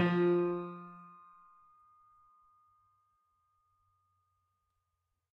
Tiny little piano bits of piano recordings
marcus, noise, horndt, sound, piano, sounds, live